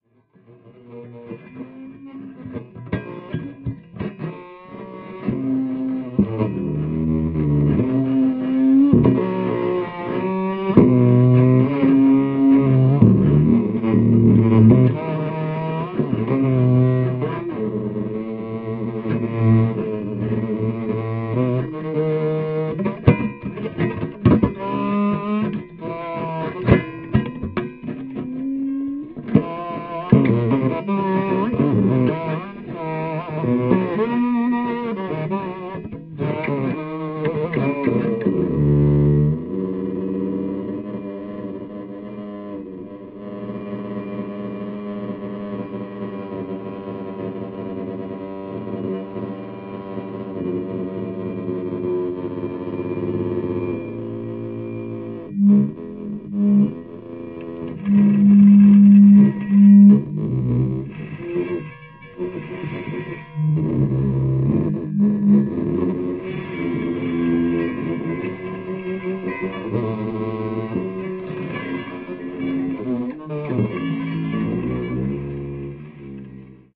solo study 2a
Recording of prepared guitar solo, pretty lo-fi.
prepared-guitar, lo-fi, guitar